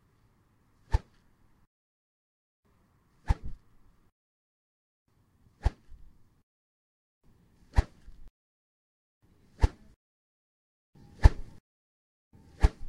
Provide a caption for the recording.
Golf Swing
A golf driver being swung.
fast, golf, gust, movement, quick, swing, whoosh, wind